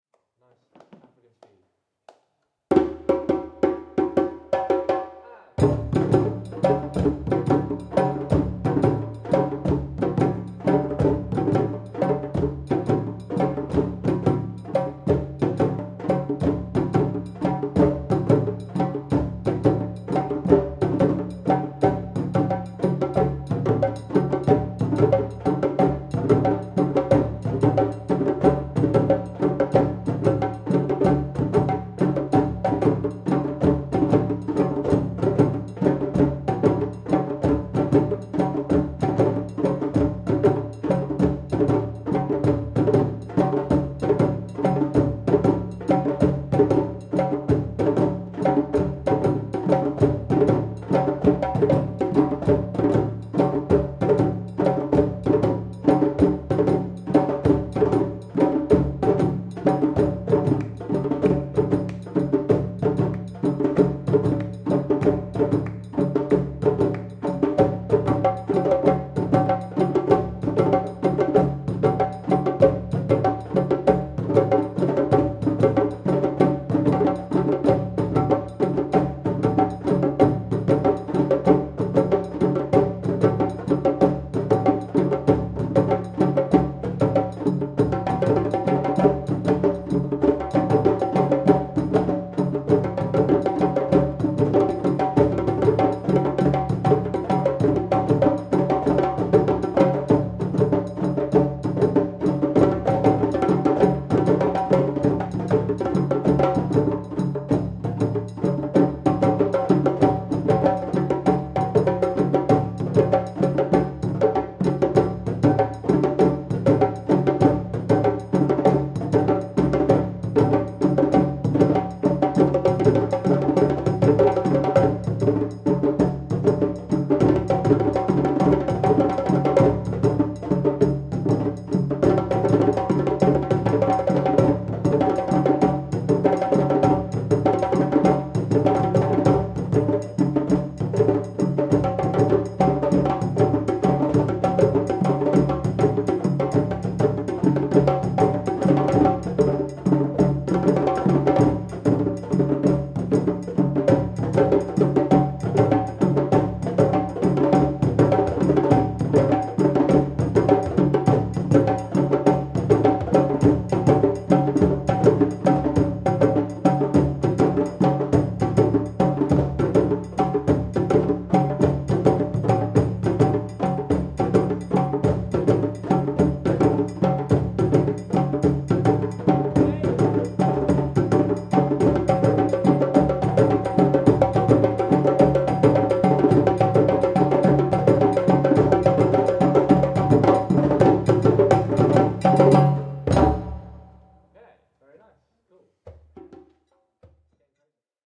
African tribal drums, beat 1
Recorded on Zoom H4n.
Tribal drum beat performed by Drum Africa, London, UK.
africa, african, beat, djembe, drum, drummers, drumming, drums, field-recording, human, music, people, person, tribal, tribe